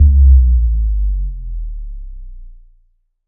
A bass-driven, low sound that gives the feel of time slowing down. I needed something for a bullet time project and messed around in FL Studio for a few minutes and came up with this. I am not a sound guru, but it got the job done.
bass, bullet-time, slowdown